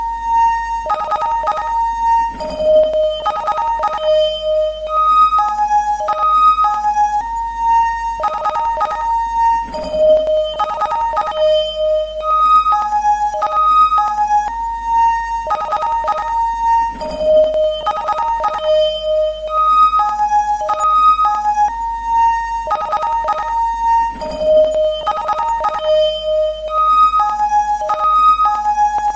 A rather soft and harmonic phone signal.
mobile, phone, ring, tone